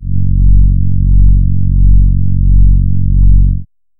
Warm Horn Fs1
An analog synth horn with a warm, friendly feel to it. This is the note F sharp in the 1st octave. (Created with AudioSauna.)
brass, horn, synth, warm